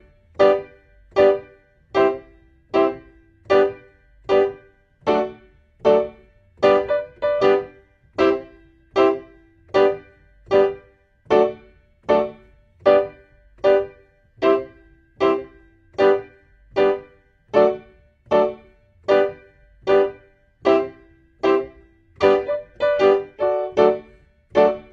zulu 77 G PIANO 4
Roots rasta reggae